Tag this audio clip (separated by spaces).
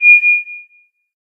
cartoon film game holly magic video movie animation